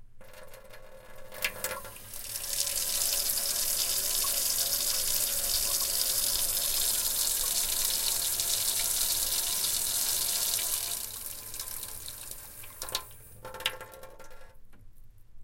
Recording of a kitchen faucet cranked up to near maximum for your watery kitchen needz.
drain, dripping, faucet, running, sink, tap, water